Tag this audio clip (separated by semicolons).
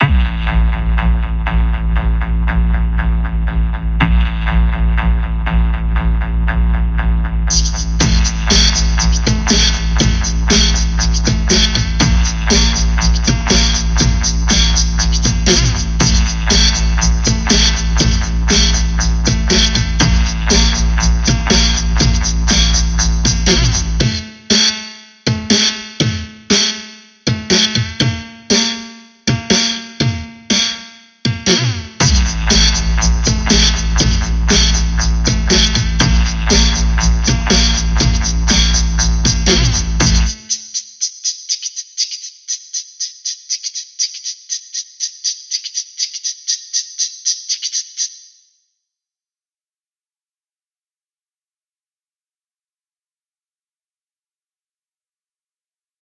arrangement; bass; beatbox; Breakbeat; high-hat; human; male; percussion; processed; vocal; voice